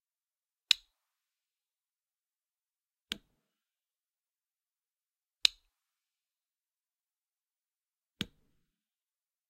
A rocker switch being swithched on and off.
click, domesticclunk, switches, electricity, electric, switch, rocker, off